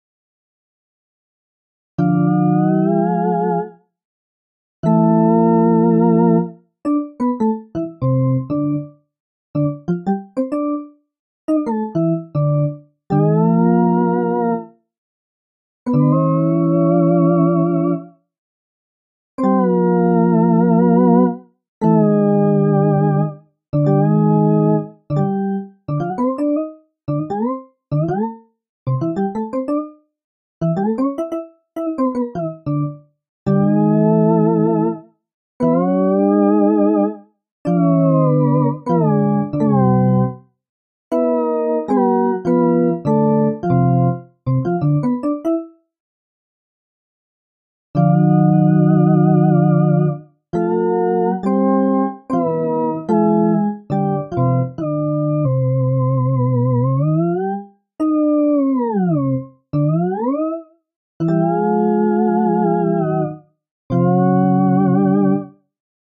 Rugrats synth sounds
This is a wave of some sounds that remind me of what sound design for the rugrats cartoon backing music. I made the sounds using an fm synthesis patch on the iOS application "addictive synth" on my iPod touch and recorded the audio within the app. No post-processing was done to the audio.
Addictive, dx7, fm, Rugrats, synth